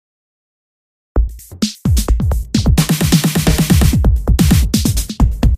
hip hop 14
song sound loop sample
beat, dance, disko, Dj, hop, lied, loop, sample, song, sound